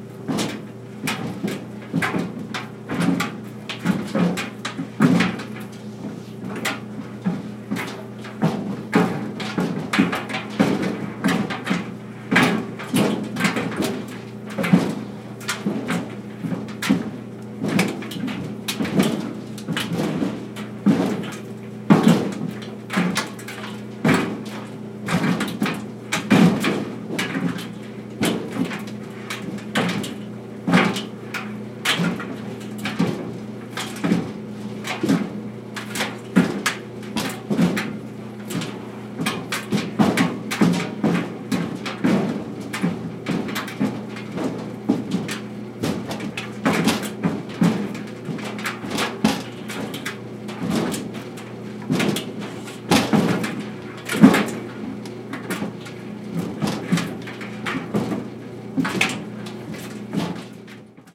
Running shoes tumbling around in a dryer. The shoes thump, the laces click, the dryer hums. One of my favorites. Recorded with Sennheiser MKE 300 directional electret condenser mic on DV camcorder. Minimal processing, normalized to -3.0 dB.
thump, machine, hum, household, click, noise